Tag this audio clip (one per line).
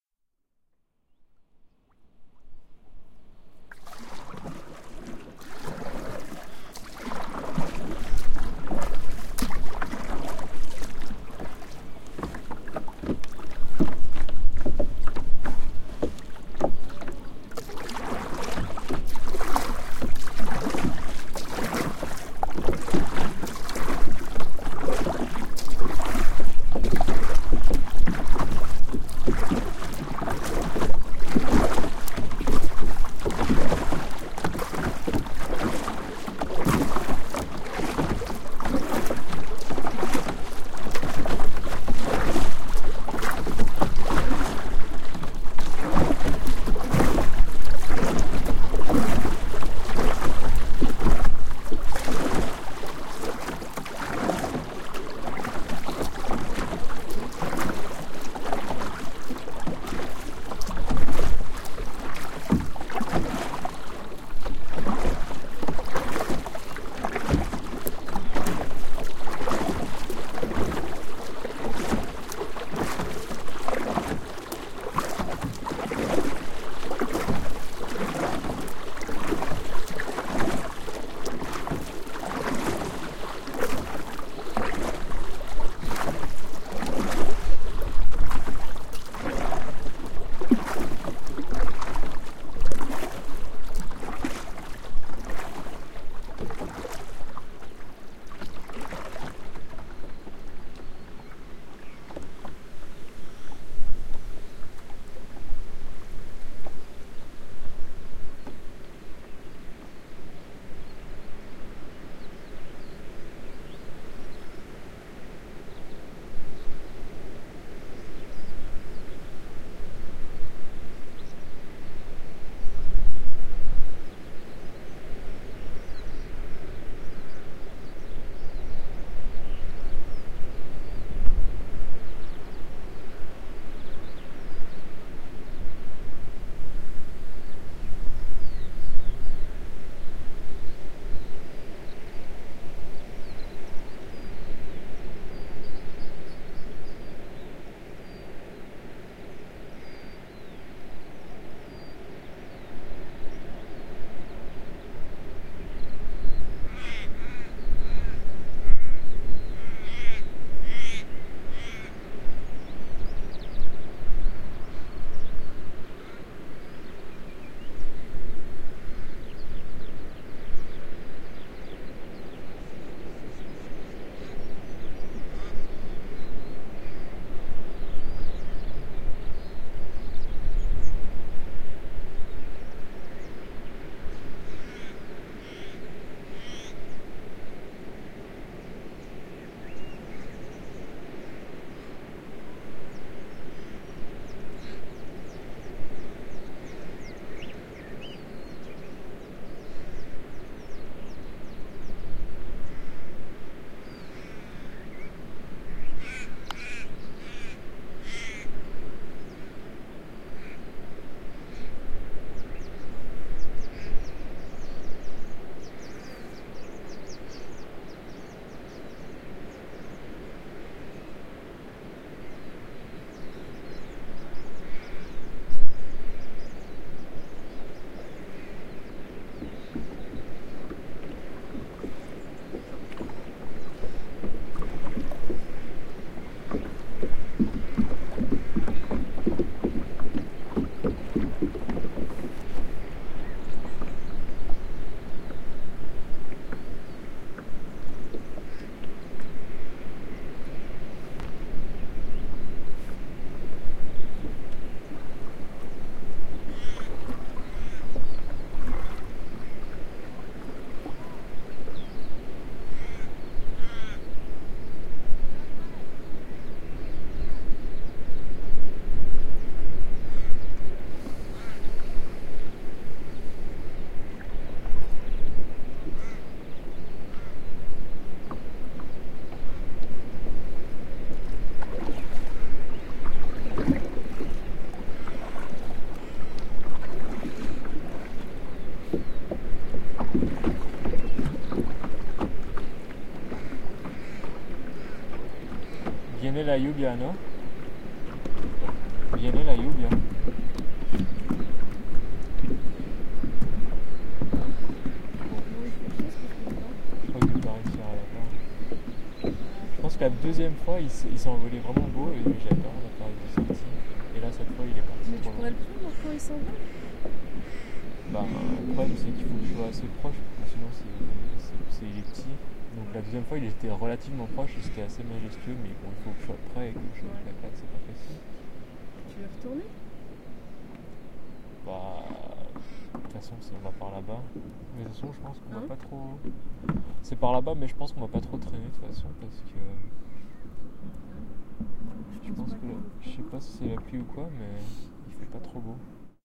river
water
recording
sea
wind
pelican
canoe
kayak
field